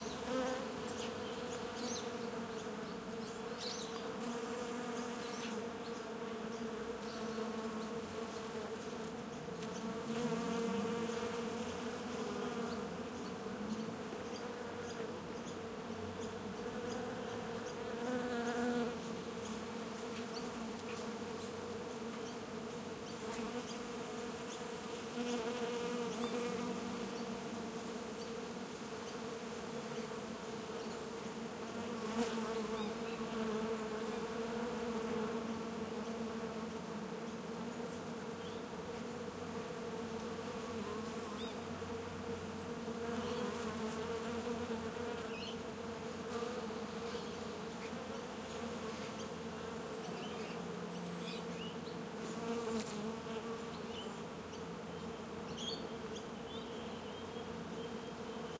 The sound of bees in my back yard. Recording chain: AT3032 microphones - Sound Devices MixPre - Edirol R09HR
bee, bees, buzzing, flies, flower, fly, garden, honey, insects, pollination, spring, vibrate